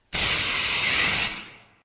new gas
Gas or spray paint
spray-paint, gas